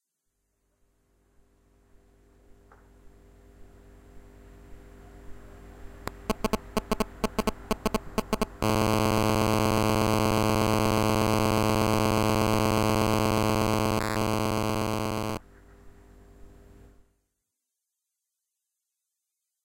cell phone interference with speaker
The sound of and interference between a phona and a speaker.
phone, interference, UPF-CS13, campus-upf, speaker